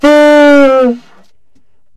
Tenor Sax d3
The format is ready to use in sampletank but obviously can be imported to other samplers. The collection includes multiple articulations for a realistic performance.
sampled-instruments, tenor-sax, saxophone, jazz, vst, sax, woodwind